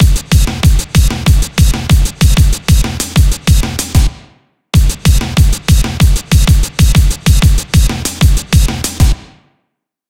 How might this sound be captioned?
Drum Beat 6 - 95bpm
Groove assembled from various sources and processed using Ableton.
percussion-loop, drum-loop, drums, groovy